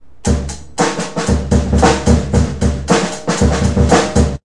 In this recording you can hear me playing the drums. It is a very bad recording because my equipment is not the best at all and I recorded down in my cellar where the acoustic is not very good!